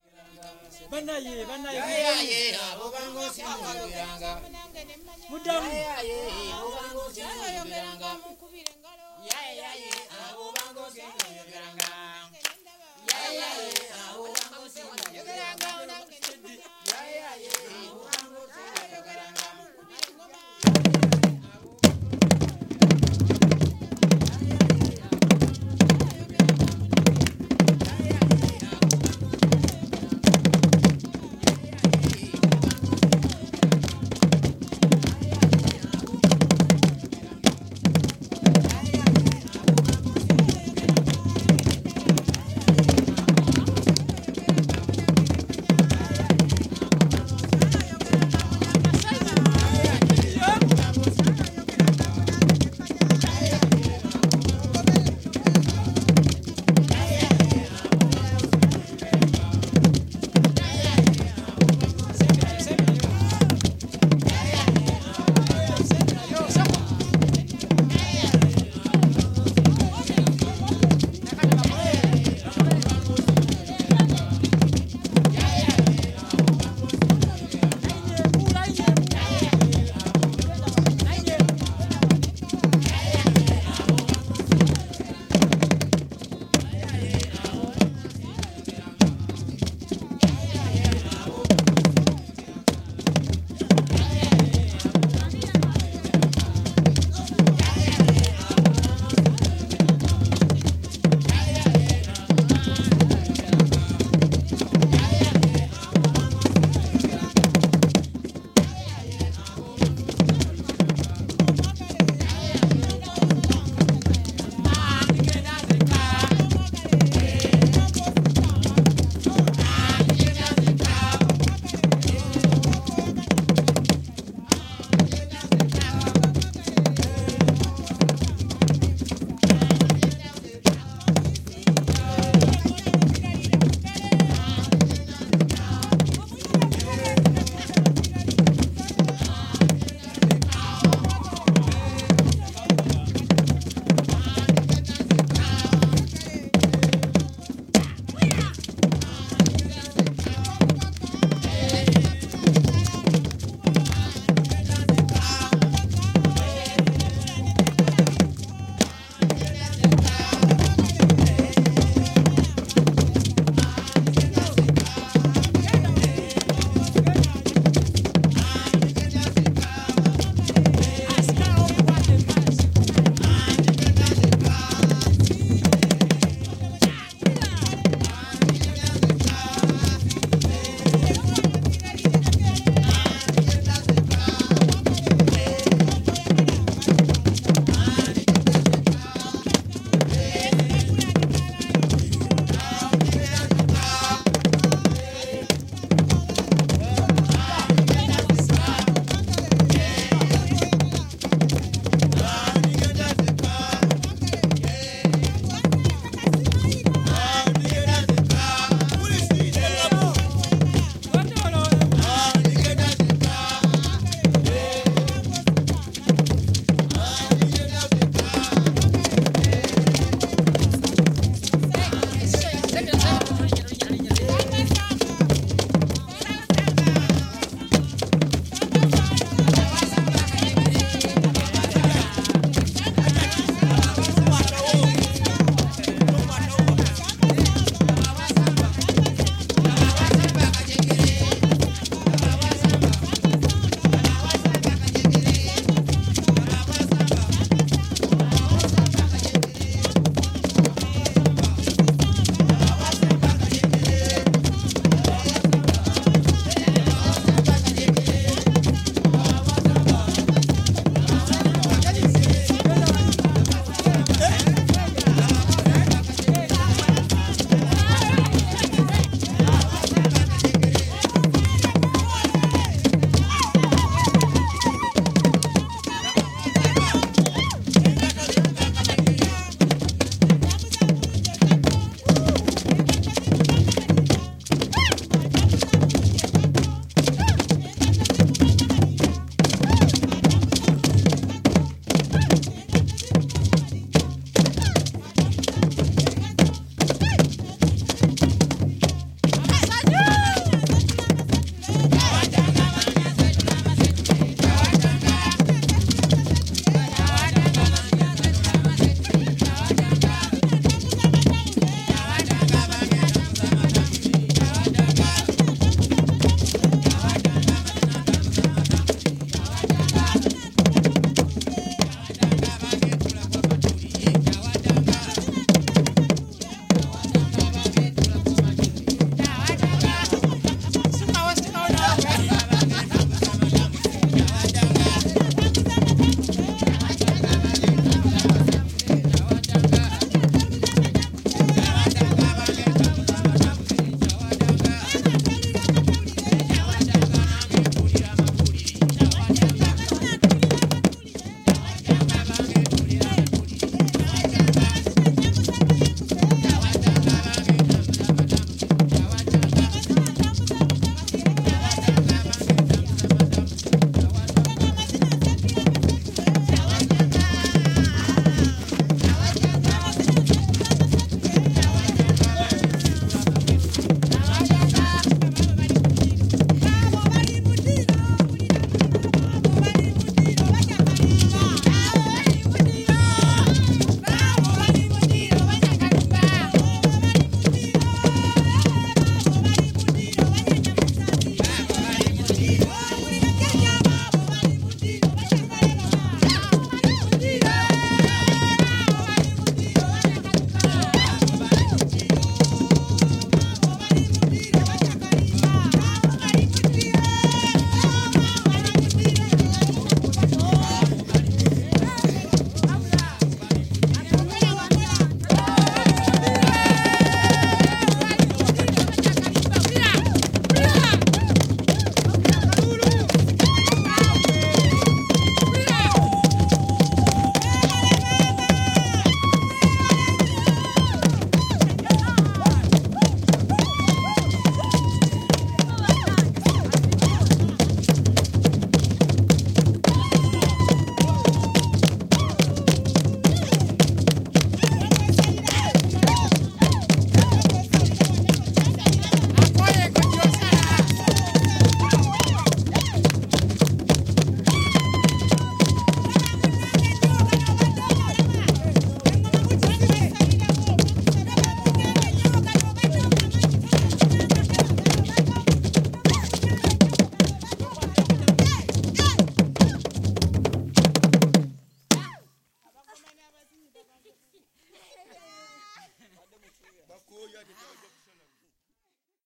Ugandan song and drums
A local music group called Clone perform a lively song and dance with a group of drummers at Dewe near Kampala in Uganda. Recorded on Zoom H2.
africa, african-drums, beat, drum, drums, folk-music, folk-song, live-performance, percussion, rhythm, uganda